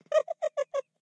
monstro feito por humano - human voice
monstrao, monstro, monster, bicho, bichinho, bichao, monstrinho